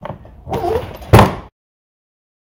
Recording of myt reclinable chair being actioned.